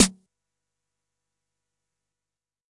various hits 1 006
Snares from a Jomox Xbase09 recorded with a Millenia STT1